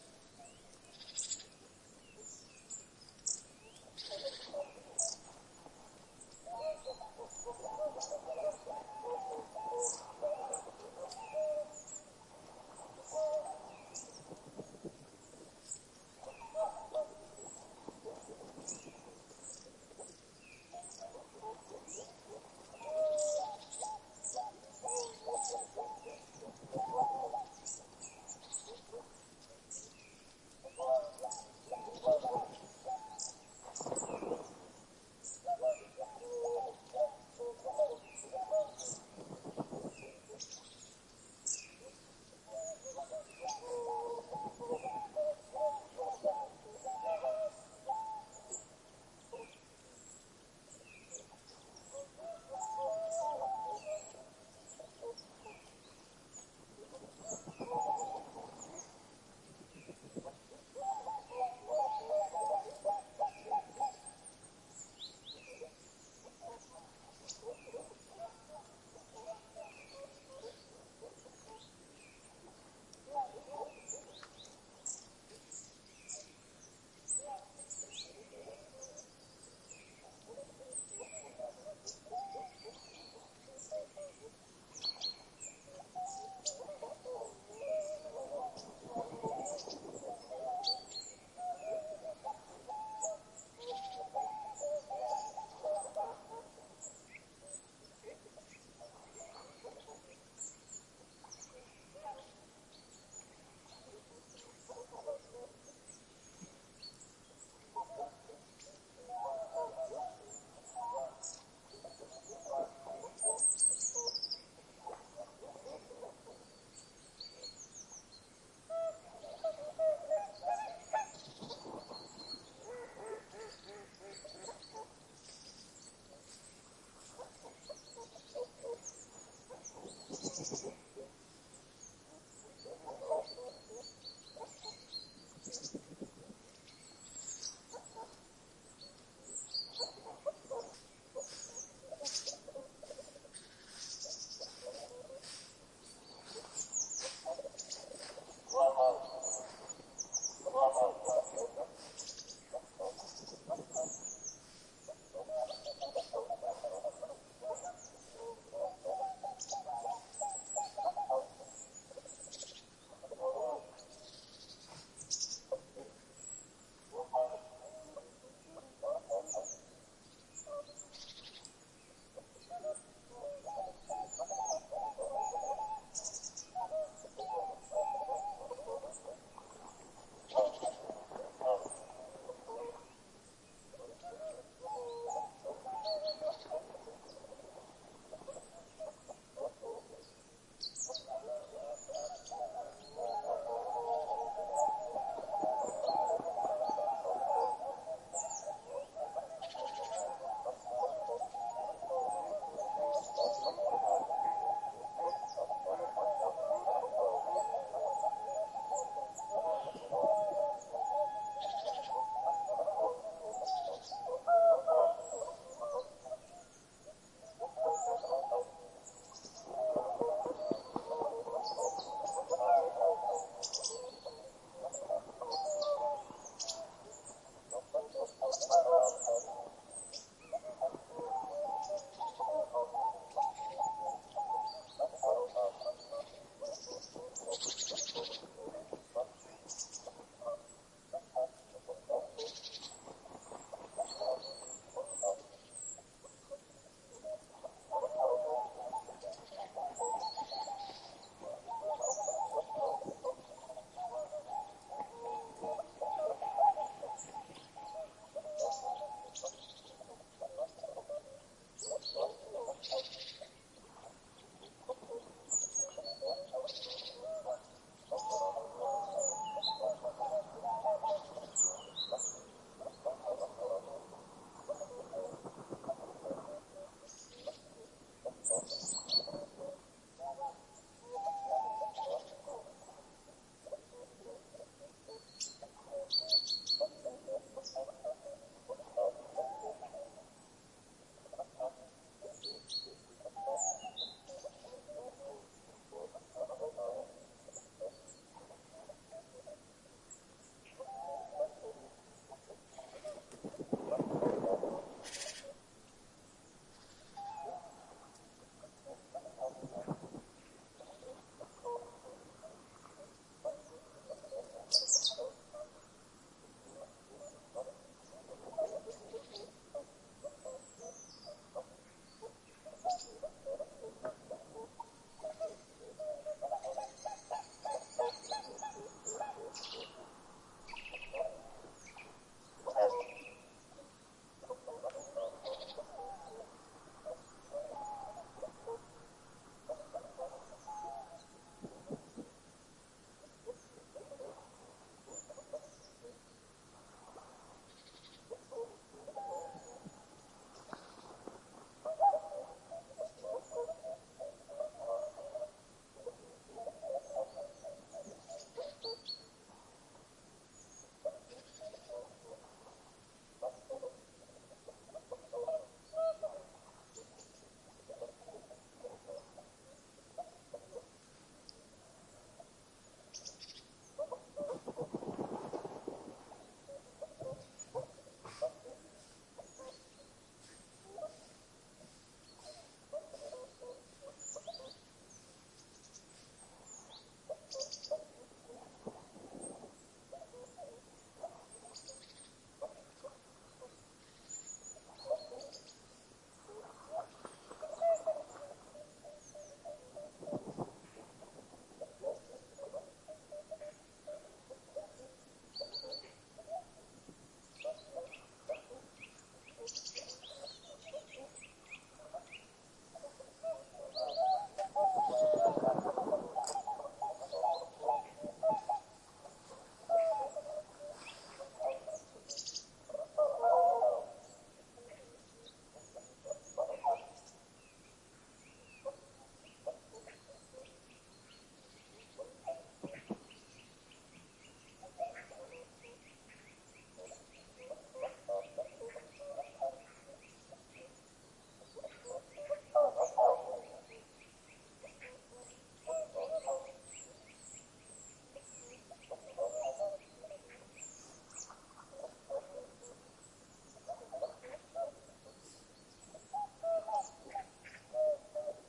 Went to Målsjön in Kristdala, Sweden. I was surprised by bird life so early in the year. It's mainly the song tunes that are heard but also other birds like mallards, nuthatch and maybe Willow tit and many more.
Microphones two line audio CM3